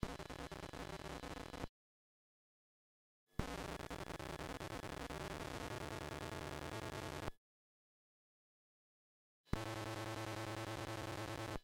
PGJ TelPickupCoil Recording Raw.04
In the spirit of the jam, as a thank you for the chance to be a part of such an awesome event and to meet new incredibly talented people, I decided to give away for free some samples of recording I did of electric current and some final SFX that were used in the game. I hope you find these useful!
hum, telephone-pickup-coil, electric-current